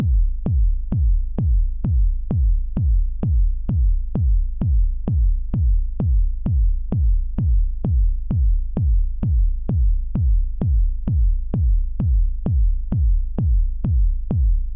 Envelope and Sea Devil filter resonating